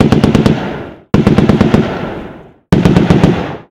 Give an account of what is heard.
Machine gun shots/bursts.